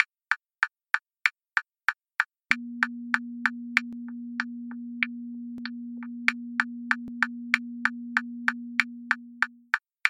SIVALOGANATHAN Virginie 2019 2020 Time
For this track, I used a rhythm pist for ten seconds. Then in the middle I created a sound that I put the sound down to show that sometimes time can flies so fast for someone and slower for someone else.
rhythm, tictoc, time